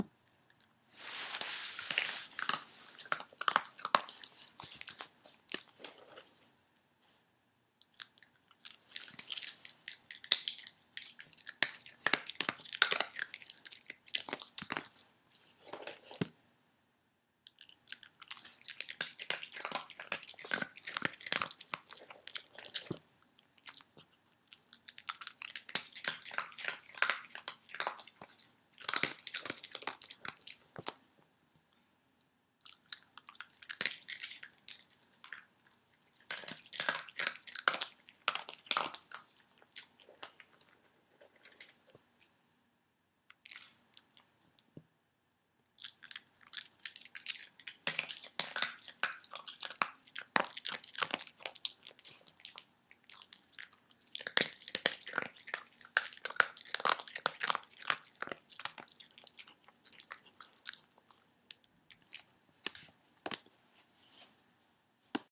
perro comiendo galletas.
Dog eating cookies
comiendo, dog, eat, perro